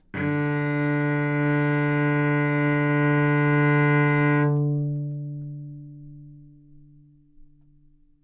Cello - D3 - other
Part of the Good-sounds dataset of monophonic instrumental sounds.
instrument::cello
note::D
octave::3
midi note::38
good-sounds-id::362
dynamic_level::f
Recorded for experimental purposes
cello, D3, good-sounds, multisample, neumann-U87